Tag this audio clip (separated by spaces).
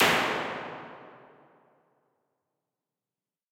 Impulse IR Plate Response Reverb